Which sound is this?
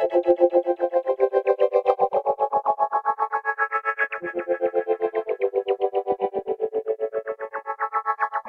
Arp2Soft
Created with a miniKorg for the Dutch Holly song Outlaw (Makin' the Scene)
ambient, synth, electronic, loop, rhythmic, dance, psychedelic, atmosphere, pad, dreamy